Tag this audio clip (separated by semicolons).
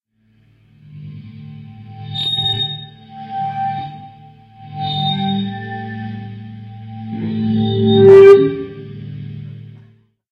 feedback; guitar